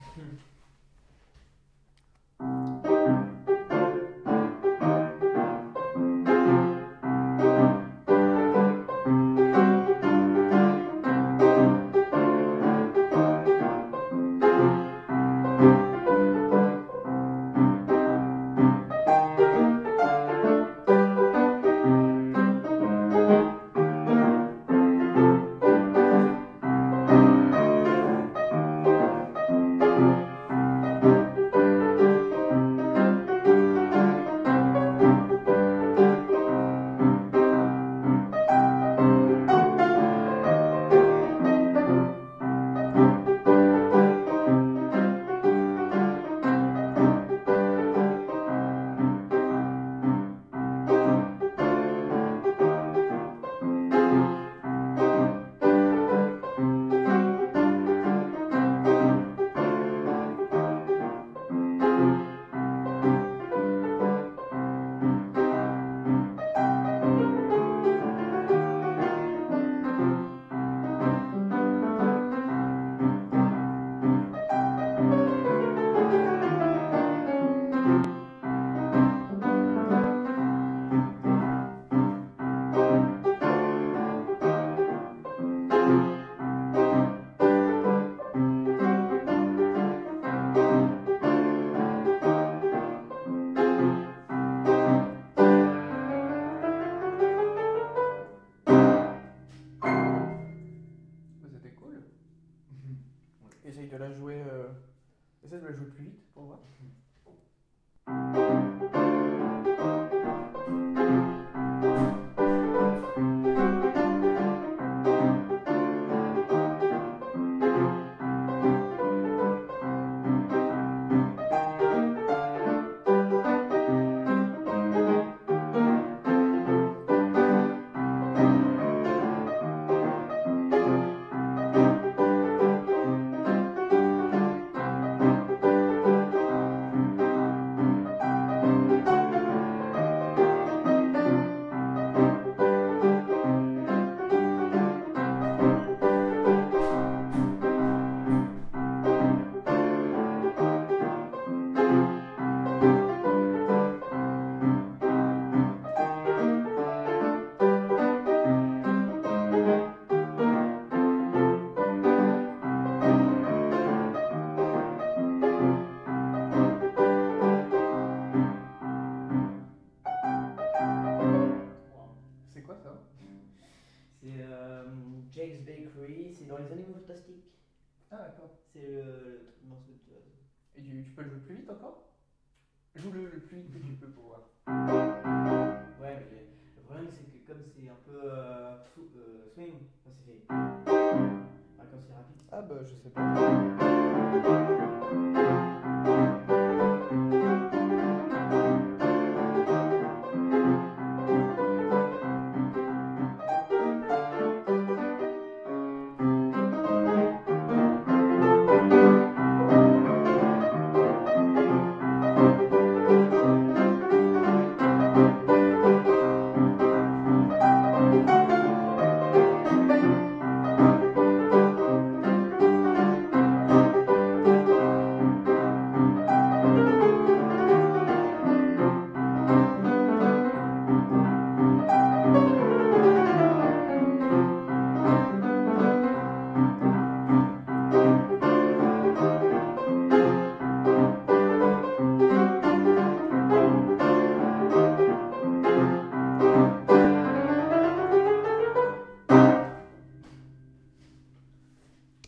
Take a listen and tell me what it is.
Piano Bar 1
Bar, House, Piano